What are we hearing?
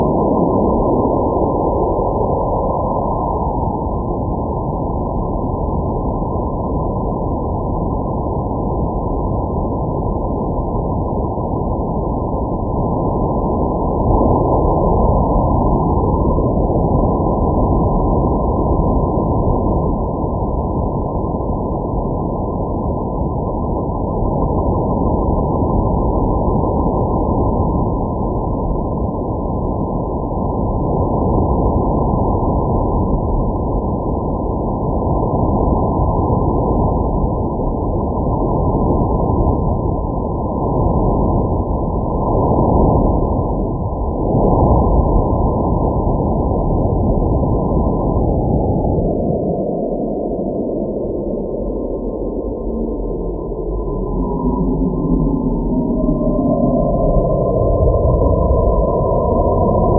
the sample is created out of an image from a place in vienna